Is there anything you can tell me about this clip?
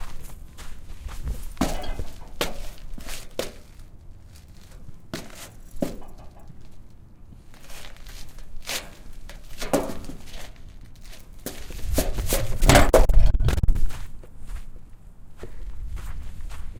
FX Footsteps Metal 02
foley, foot, footsteps, shoe, step